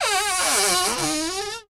Grince Plac Lg Md Spe 1
a cupboard creaking